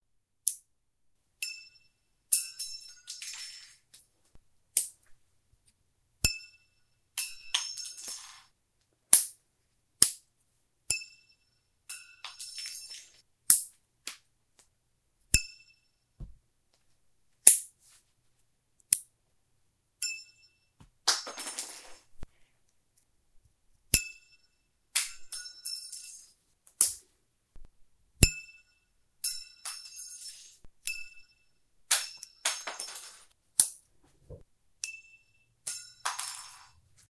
Tire gauge pin ringing and clicks
Me playing around with a belt clip on a tire gauge my dad gave me. The sounds are of me taking it off and putting it back on. Recorded autolevel via TASCAM DR-07 MkII XY pattern.
Potential uses
- Grenade pins
- Firing pins on firearms (the clicking sound)
- M1 Garand clips if you're desperate in a WWII flick for school
- Possibly reverb trails for gunfire
firearm, firing, floor, grenade, gun, military, pin, pistol, rifle, tascam, throw, weapon, wood